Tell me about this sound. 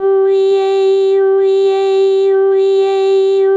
uiayeuiaye 67 G3 Bcl
vocal formants pitched under Simplesong a macintosh software and using the princess voice
formants, vocal, synthetic, voice